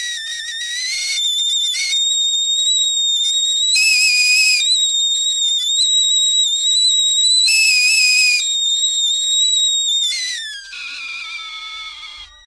kettle short
My tea kettle's crescendo. Noise removed (I think)
Recorded with AT2020 USB directly to Audacity
boiling, bubbling, hot, kettle, steam, stove, water, whistle